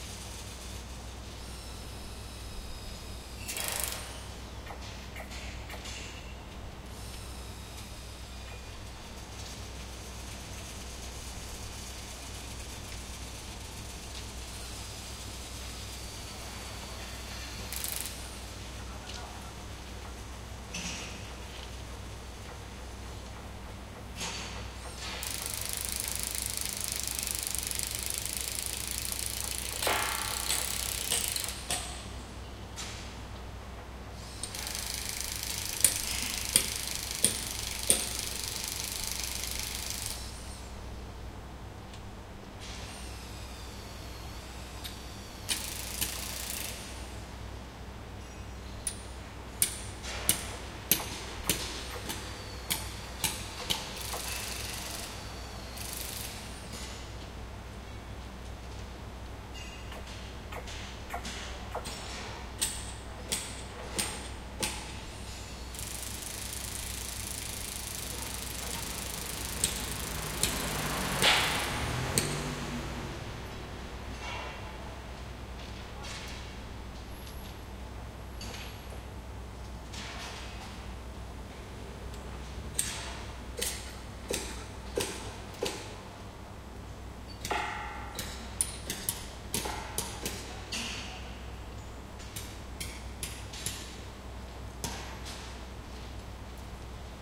Walking in the city of Matsudo around midnight, looking for interesting sounds, I noticed that the central stations' train tracks were undergoing maintenance work. I recorded two takes. See this sound pack for the other one.
Recorded with Zoom H2n in MS-Stereo.